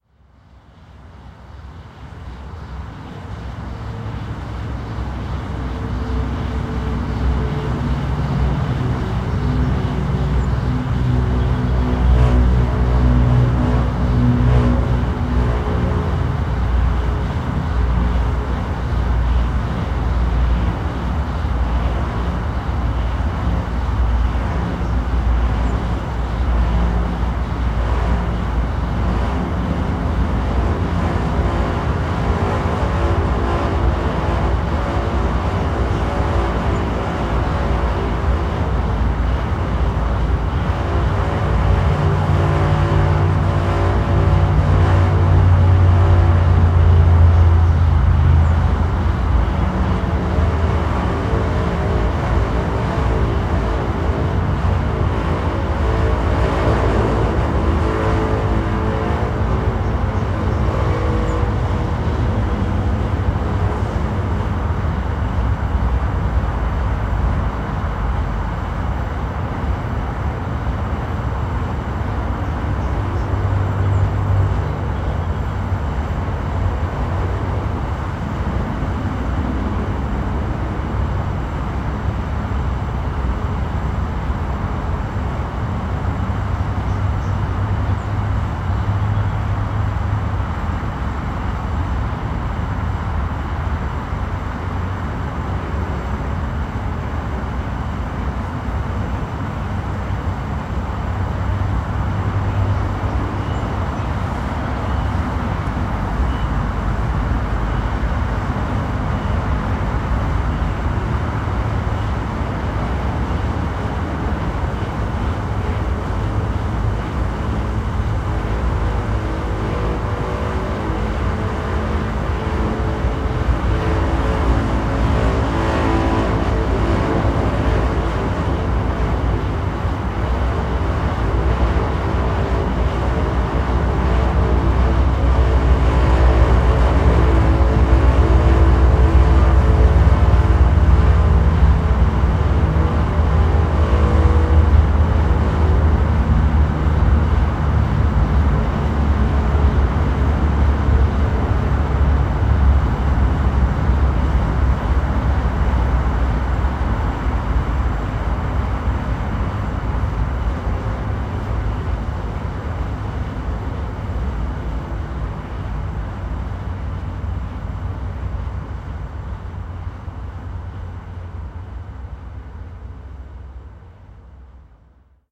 Recorded on Friday, April 23, 2010 with an Audio Technica shotgun microphone (AT835b) pointed in the direction of the solitary windmill outside New England Tech. (visible from I-95 along the Warwick area).
noise-pollution, windmill, rhode-island